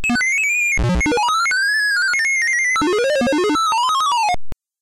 Audio demonstration of the quick sort algorithm from a Quick Basic 4.5 example program called SORTDEMO.BAS